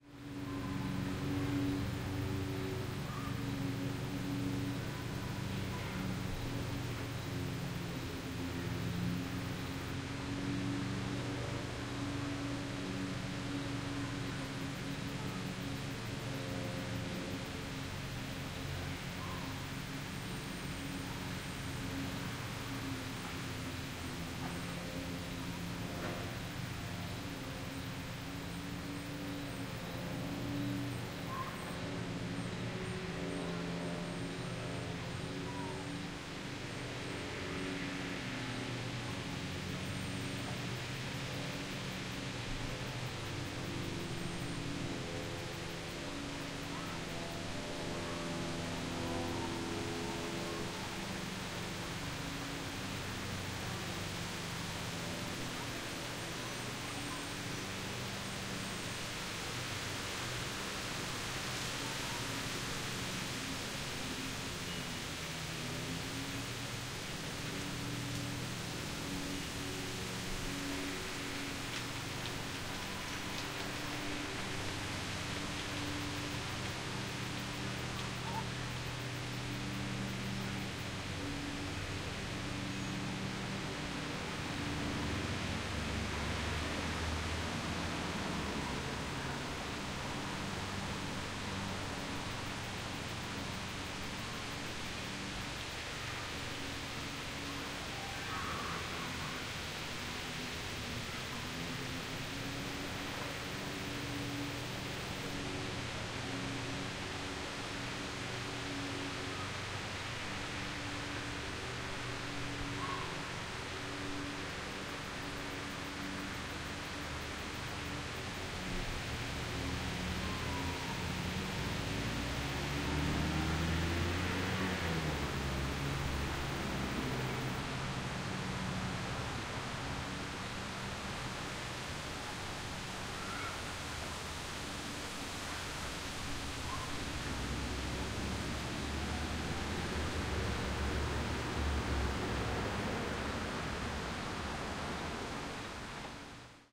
LateAfternoonSept12th2015SmallMidwestTown
A STEREO recording made at 4:50PM on Saturday September 12th 2015 of the ambience of a small town near a playground. Up until this day, the weather here in the Midwest had been your typical high-heat high-humidity. But, the day before this field-recording was made the wonderful jet-stream had dropped down on us, a cool, mass of LESS-HUMID Canadian air, making the leaves sing through the trees with the lifting of the northwest breezes. A gentle tinkling wind-chime can be heard from 1:05 to 1:20.
Recording made in stereo with Marantz PMD661 and two Sennheiser ME66 microphones mounted on tripods about 4 feet off the ground.
ambience, autumn, breeze, childhood, children, children-playing, crickets, droning-plane, early-autumn, field-recording, later-afternoon, lawnmower, memories, sifting-leaves, town, wind, wind-chime, wind-chimes